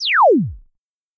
TV, shutdown!
This sound can for example be used in movies, games, beatz - you name it!
If you enjoyed the sound, please STAR, COMMENT, SPREAD THE WORD!🗣 It really helps!
application, computer, device, display, electronic, hardware, laptop, screen, shutdown, smartphone, software, tablet, technology, television, tv